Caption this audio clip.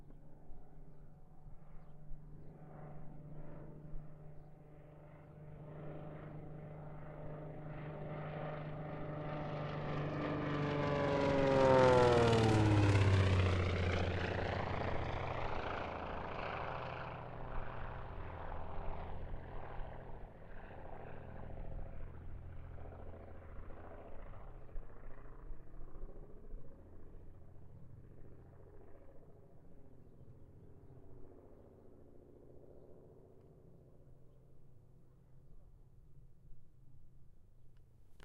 fnk bimotore beechcraft
plane, zoom, Hz, beechcraft, c-45, fnk, airplane, bimotor, h4, channels, f, 16bit, ink